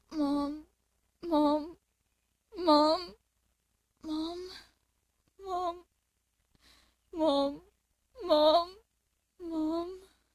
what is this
crying "mom"
mom, female, hurt, acting, upset, tears, worried, whisper, voice, emotional, scared, sad, crying